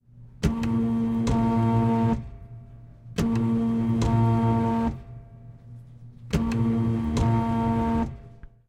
Tape Binder 2
Recording of a tape-binder.
field-recording, industrial, machine, tape-binder